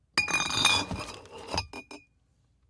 Box Of Bottles Put In FF252
Multiple bottles moving and sliding around in a box. Glass-on-glass tinging, rolling bottles, medium to high pitch, robust.
bottles-in-box, glass-on-glass